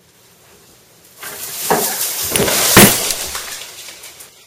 Christmas falling over
This is a sound where a tree falls over, and the christmas balls break.
christmas, fall, over, tree